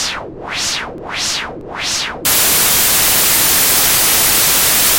MINISCALCO Selena 2018 2019 SpatialInterferences
For this sound, I wanted to recreate a spatial effect, such as interference with a UFO or aliens. It is divided into two parts: a communication part and an « interruption » part. So I just generated a white noise with an amplitude of 0.5 and I added a WahWah effect. Finally, for the second part of the interference, I generated the same white sound that was used for the first party, but I added no effect.
Description - Typologie de Schaeffer
Masse : Son cannelé
Timbre: Brillant
Grain : Son rugueux
Allure : Vibrato
Dynamique : début du son assez violent, son graduel pendant toute la première partie , puis deuxième partie plus abrupte
Profil mélodique: Variation scalaire
Profil de masse : le son comporte plusieurs hauteurs. Certaines se forment une répétition de quelques secondes puis dans la seconde partie du son, il n’y a qu’une seule hauteur linéaire.
spatial, alien, space, ship, UFO, communication